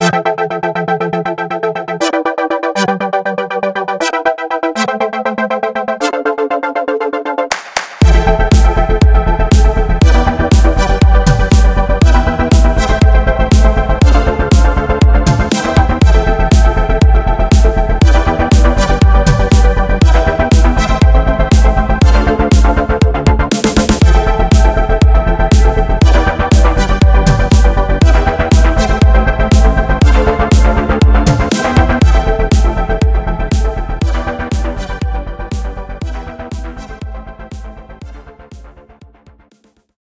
Synthwave 120bpm
This sound was created with layering and frequency processing.
BPM 120
Synthwave, Beat, 120bpm, Music, Loop, Vintage, Retrowave, LoFi, Background, Dance, Electro